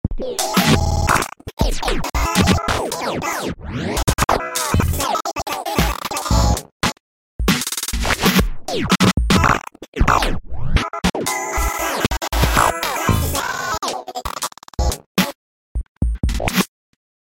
weird sounds with my voice.